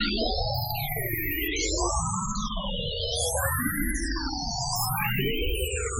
Another batch of space sounds more suitable for building melodies, looping etc. See name for description.
loop, musical, sequence, sound, space